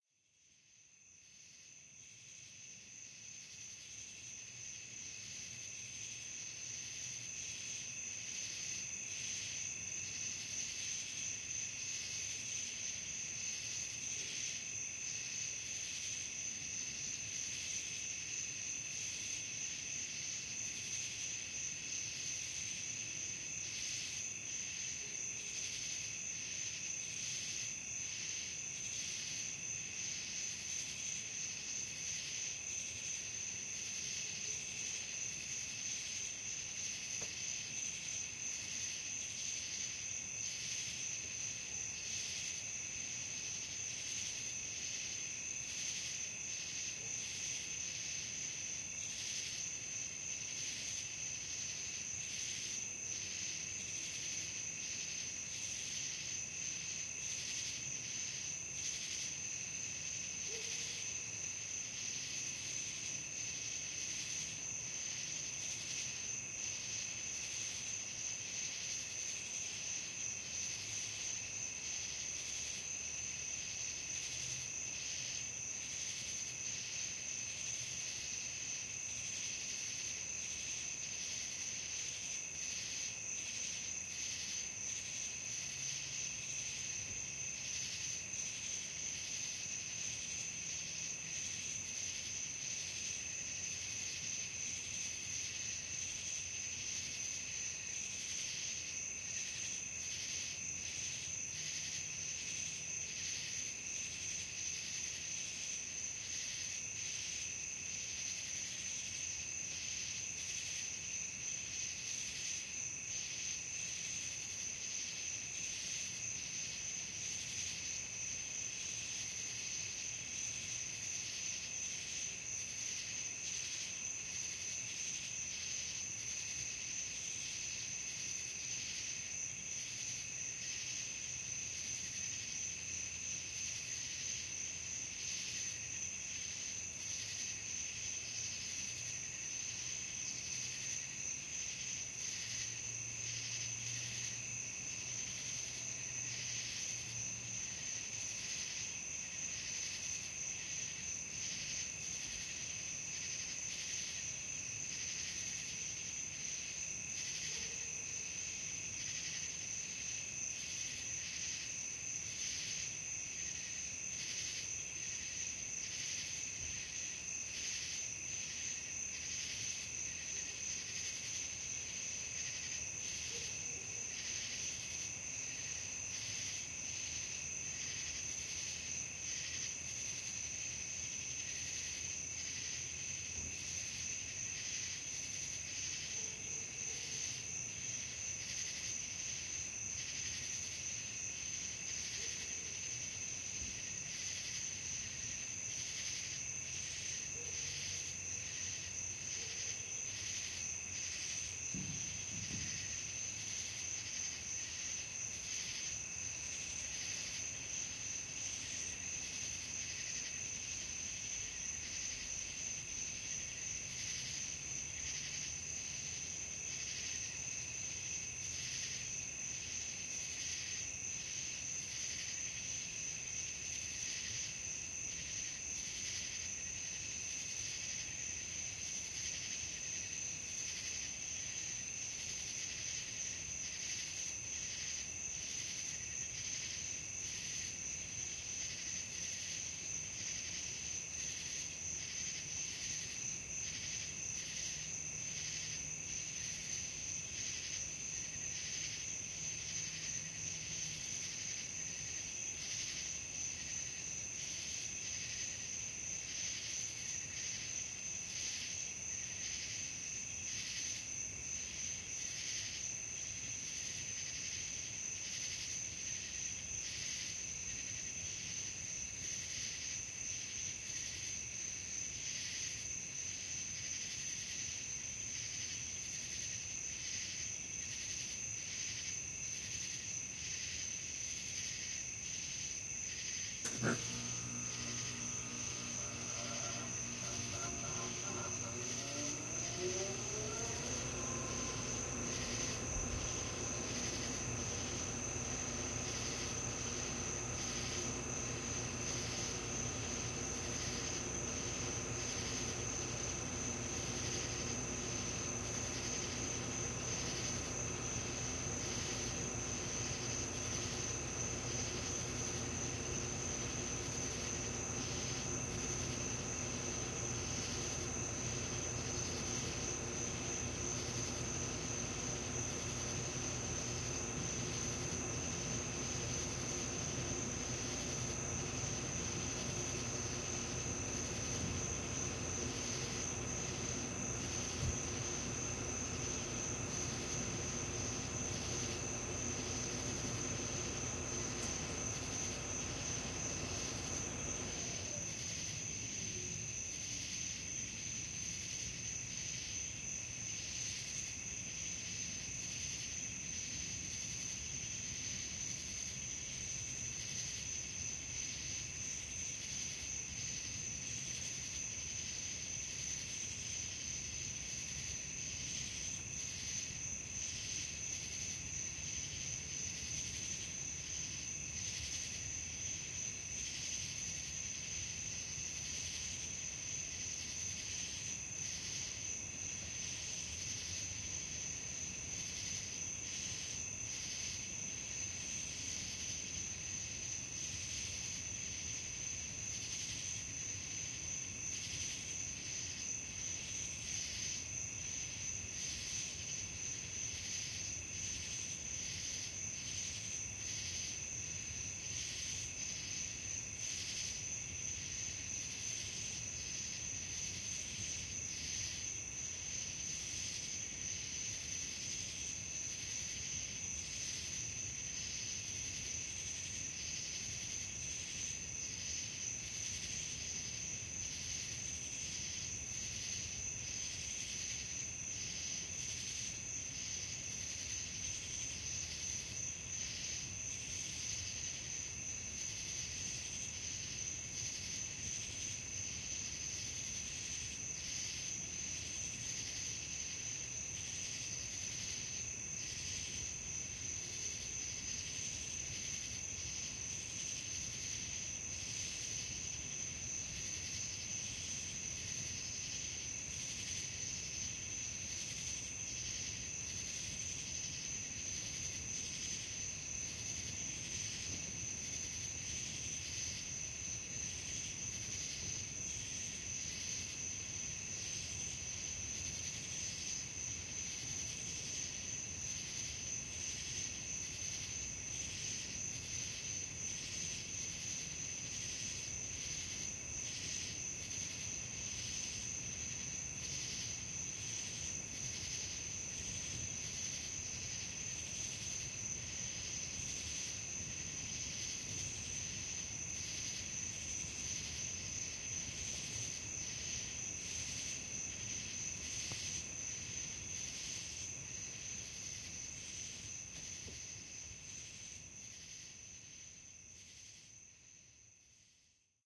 August, 2nd 2013
About eight and a half minutes worth of summer evening ambience, with crickets and cicadas leading the evening songs. Nothing too eventful, but a nice finish to a great day.
Stereo pair of Behringer C-2s (cardioid). The mics have an on-board 3-position switch, with labels "flat," "low-cut," and "-10dB." The switch was set in the -10dB position (whoops!). It is unclear if this position also activates the low-cut. Additionally, a 24dB/oct low-cut applied in Pro-Tools at 80Hz to clean up a slight wind ruffle.
Since it was late at night, and the rest of the family was in bed, I opened my window and placed the microphones (almost) right up against the screen. The A/C unit you can hear is directly below the window.
Around the 4:45 mark, the digital thermostat hit a programming mark and kicked the air conditioner back on. Roughly one minute later, I adjusted the thermostat to turn the unit back off.
Location: suburbs of Atlanta.
I'd be interested to hear/see where you use it!